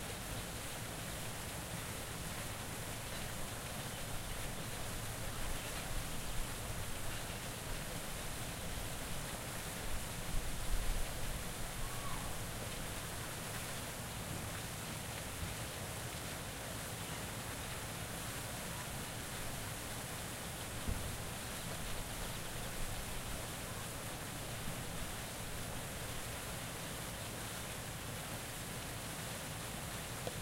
Day Fountain
Field recording of a water fountain during the day.